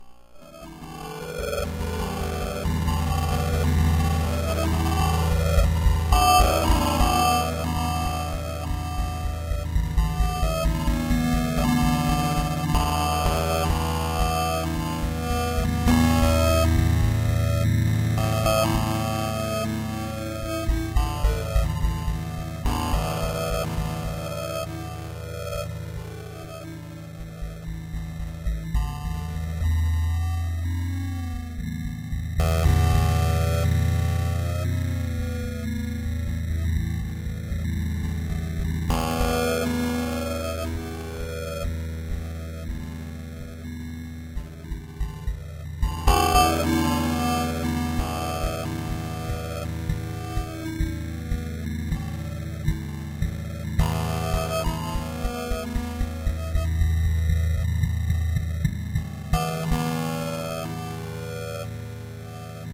digital carpet
hum and feedback recorded from a stratocaster then tweeked to hell and back. flanger and bitcrusher are the most noticeable effects.
background, guitar, texture